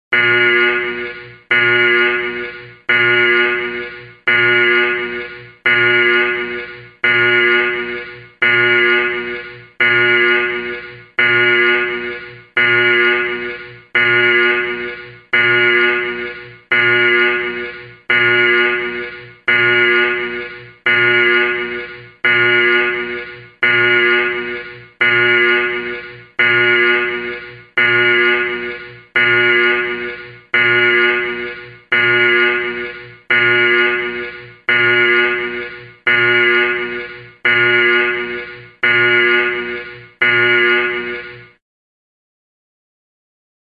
Alarm sound to evacuate area